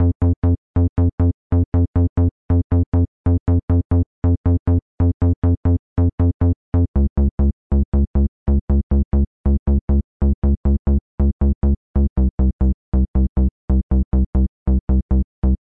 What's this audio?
my bass audiosample, 120 to 140 bmp